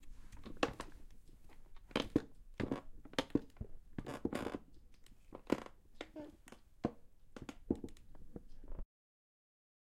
Creaking Chair SFX
a Creaking Chair in a office